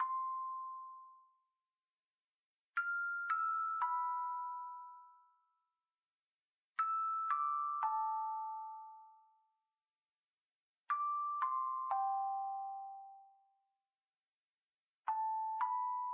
A small looping bell sound that is edited to loop endlessly.
Created by using a synthesizer and recorded with Magix studio. Edited with audacity.
ambiance, ambience, ambient, background, looping, Small, soundscape